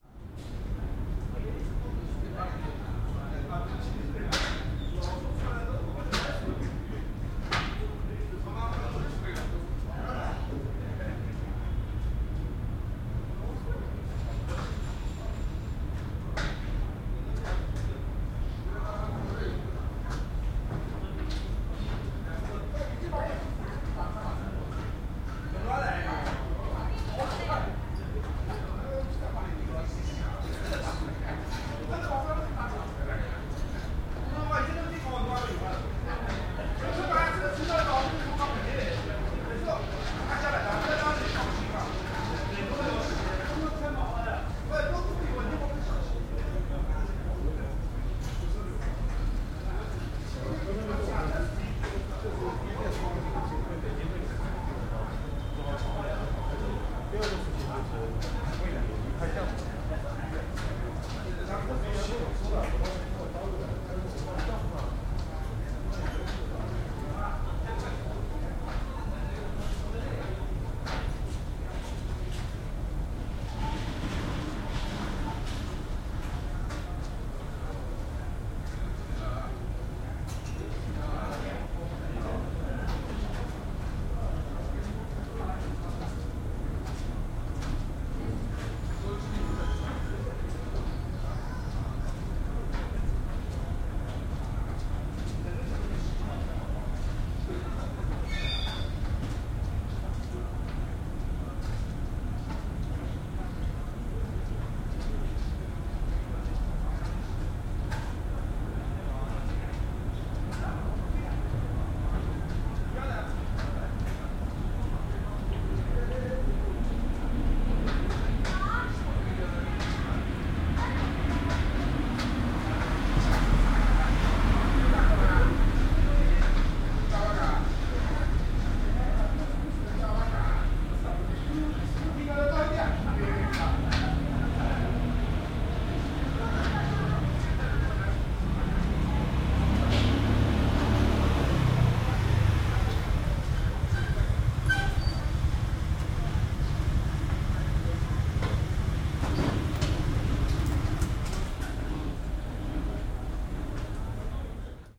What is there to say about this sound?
mah jong parlor outside
This is another recording from suburban Shanghai, a mahjong parlor at night. Recorded from across the street, one can make out the sounds of players clacking tiles, chatting, a radio tuning in and out and also a motorbike pulling up.